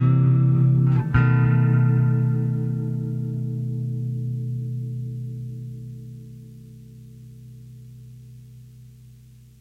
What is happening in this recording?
a slide into a chord with a whammy bar on a fender mustang and creamy electro-harmonix pedal effects